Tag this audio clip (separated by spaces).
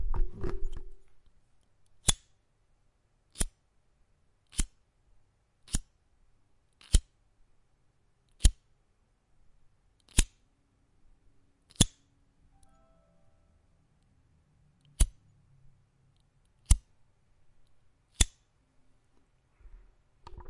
bic lighter mechero spark